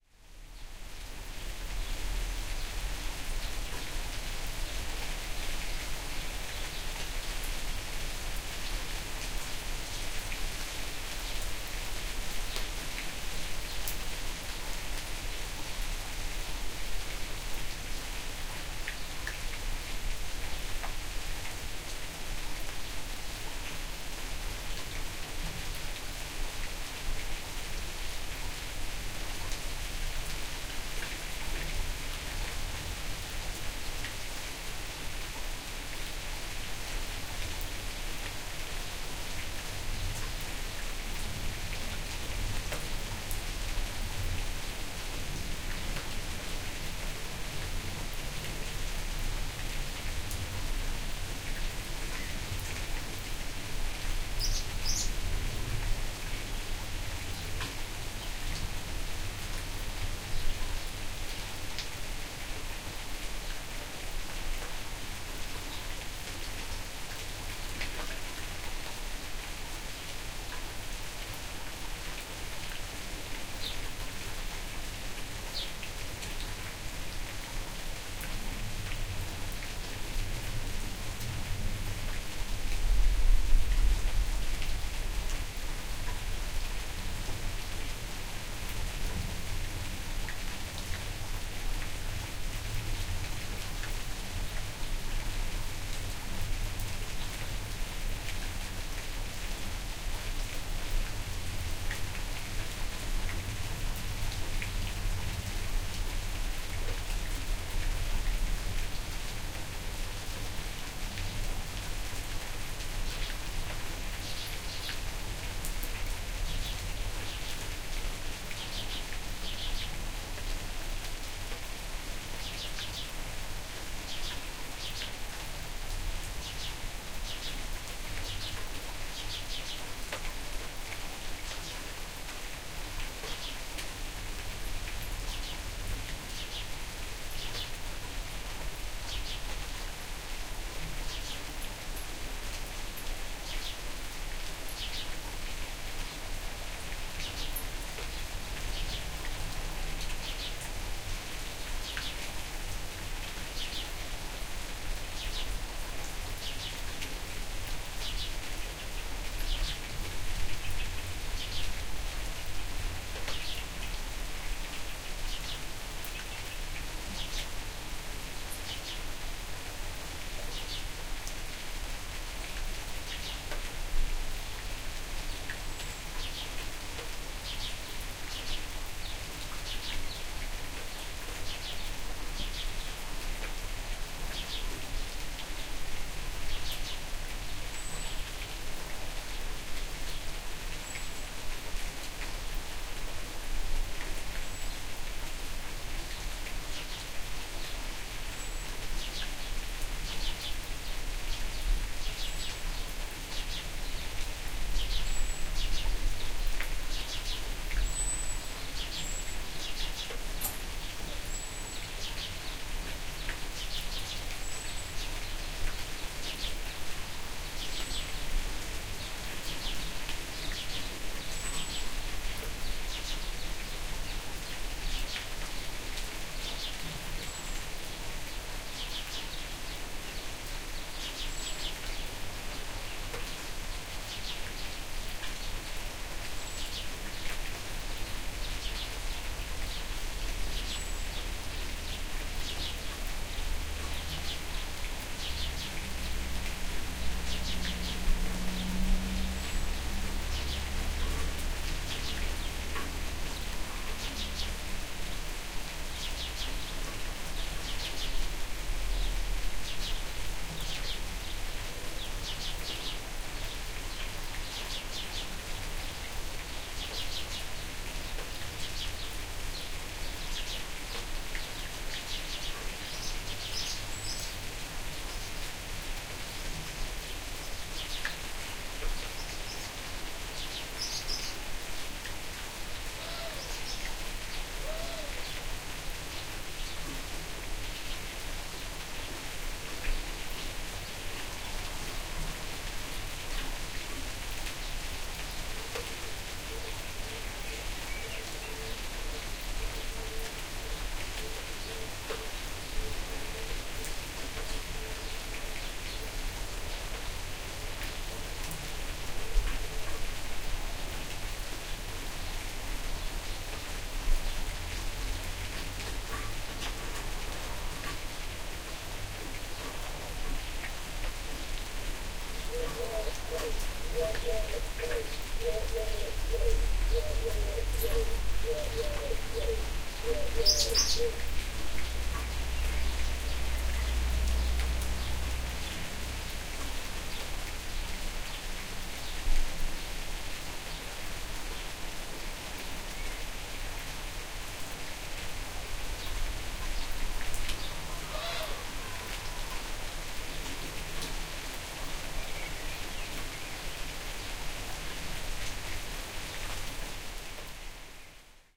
Medium rain and birds on a garden. Recorded with two Earthworks TC30K with a jecklin disk, on a SoundDevices 702.